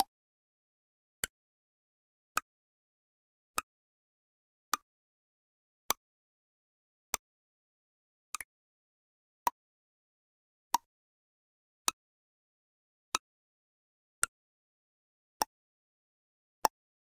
FX CACTI SPINE
Sound of individual spines from a cacti being "finger picked" like a guitar string, resulting in a percussive sound. One spine at the time, variable mid/high pitch, about one sound each second. Recorded with a Tascam DR-40
cacti, cactus, click, hand, high, hit, mid, nature, percussion, picking, pitch, spike, spine